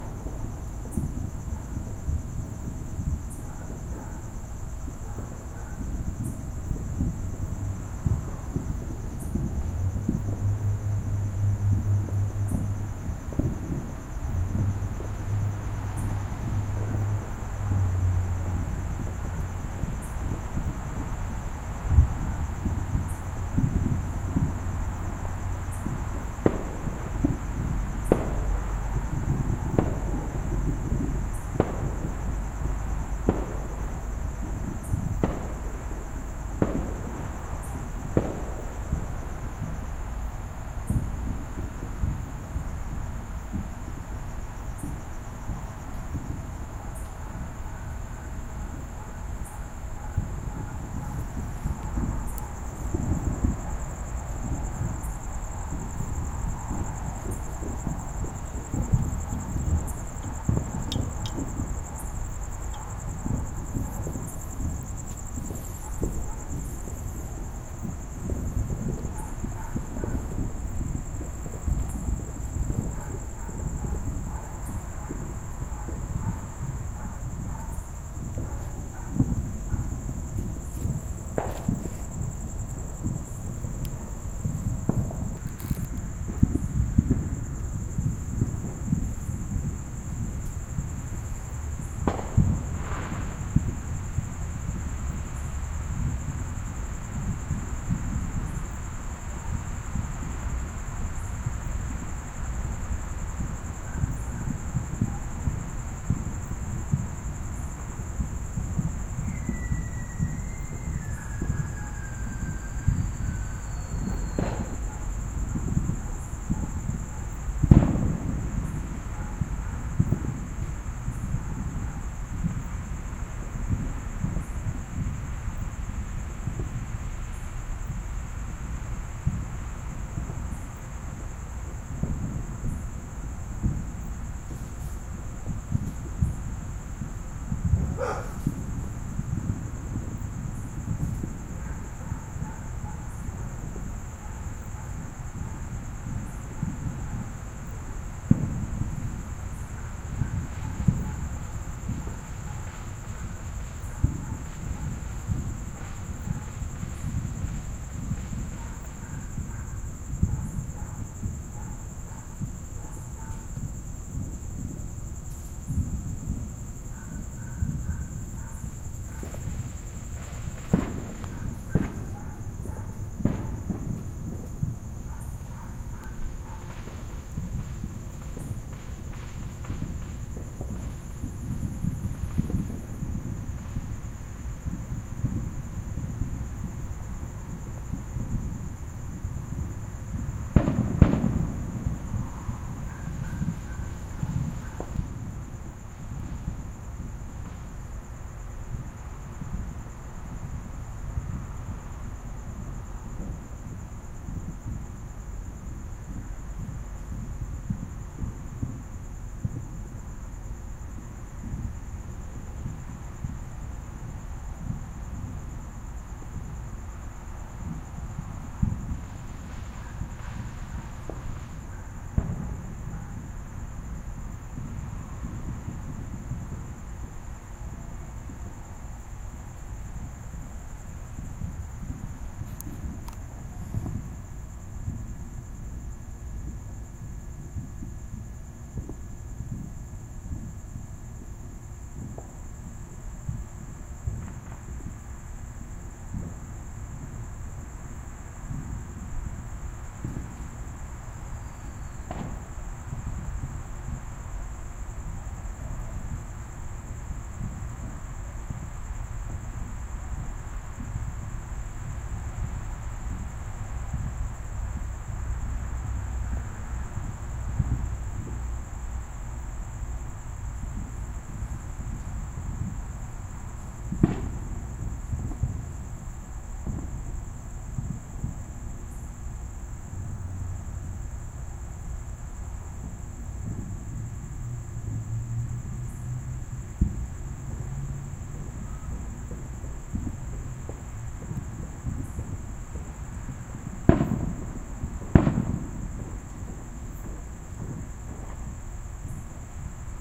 This is a recording I took in my back yard on the 4th of July 2014. There are the sounds of crickets as neighborhood fireworks being set off faintly in the background sounding almost like a war zone. The house is off a busy street, so there is also some traffic sounds. Neighborhood dogs bark at the fireworks faintly in the background.